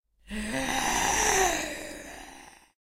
zombie girl getting a shot
A zombie girl cries when gunned. Created for my short film Fallen Valkiria. Actress: Clara Marqués.
scary, dead-girl, Fallen-Valkiria, living-dead, terrifying, horror, creepy, undead, zombie, thrill, scream-of-pain, ghoul, gore, moan, spooky, terror